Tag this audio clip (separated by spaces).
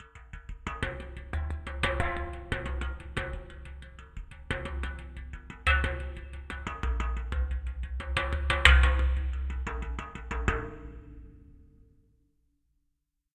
aluminium cans drum hit percussion